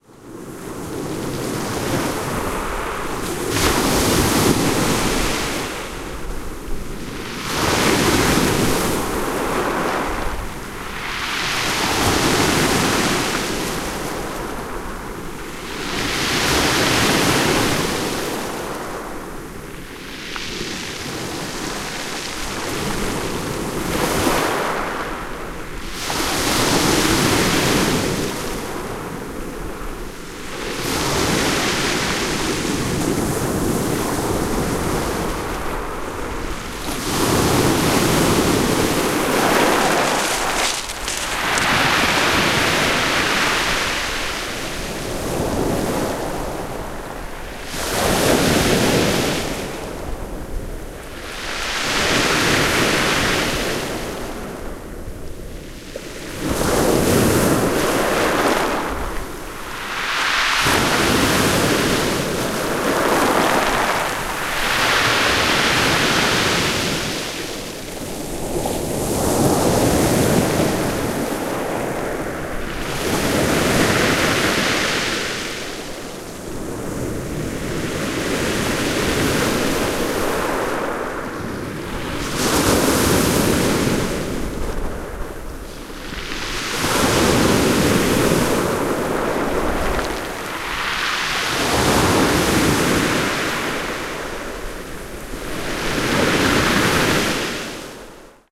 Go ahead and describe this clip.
Waves on rocky beach
Waves on the Channel Coast at Étretat, rolling back from a rocky beach - recorded with Olympus LS-11